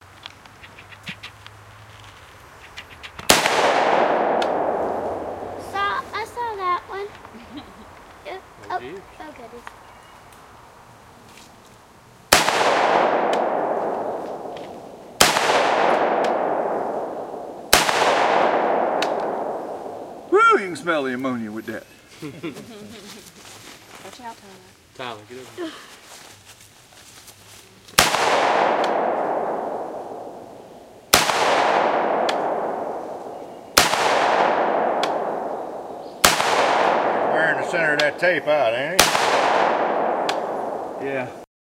AR15 Outdoor Echo
Colt AR-15, .223 caliber rifle, fired outside. Recorded on a Cannon T4i. Some camera lens noise
M16, colt, echo, outdoors, outside, rifle, shooting, shot, weapon